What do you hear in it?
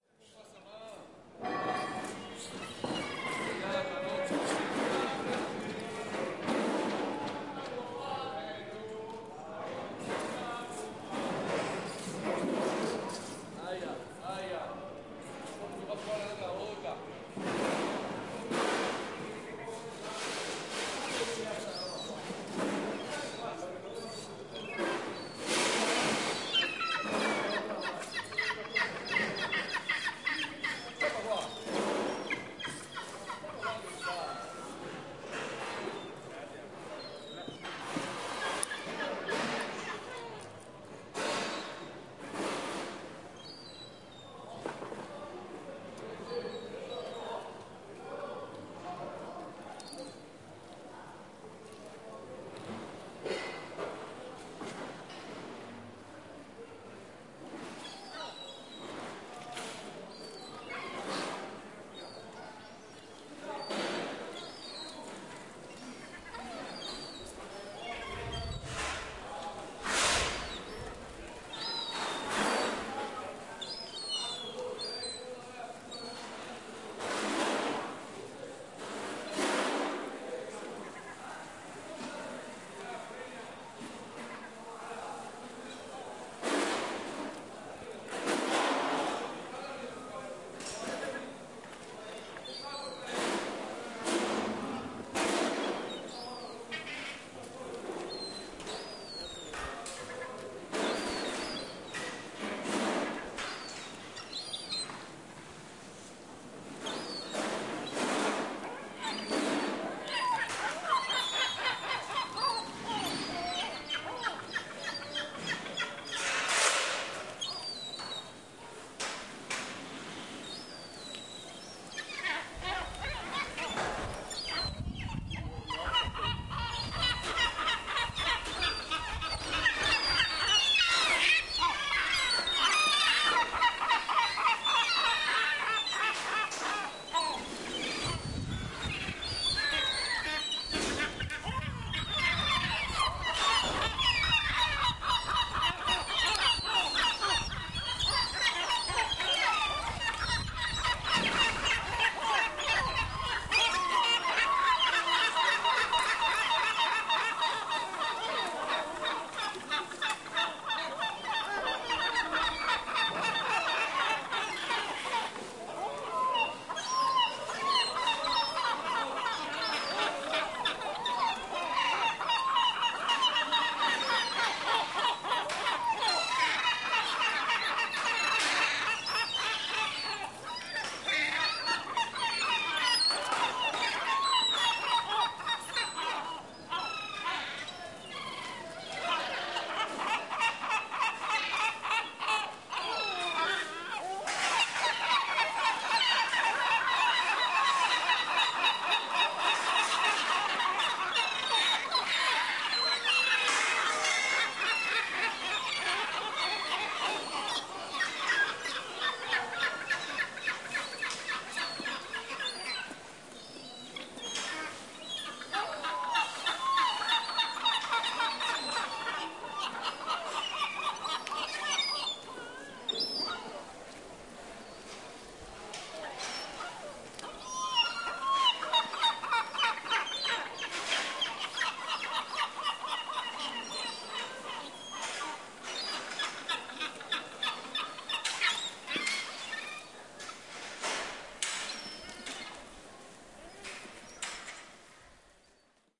130823- venezia rialto market
... closing time at rialto market...
field, italia, market, recording, rialto, venezia